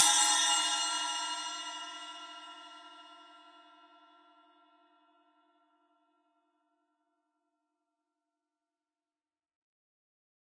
SC08inZilEFX1-Bw-v10
A 1-shot sample taken of an 8-inch diameter Zildjian EFX#1 Bell/Splash cymbal, recorded with an MXL 603 close-mic
and two Peavey electret condenser microphones in an XY pair.
Notes for samples in this pack:
Playing style:
Bl = Bell Strike
Bw = Bow Strike
Ed = Edge Strike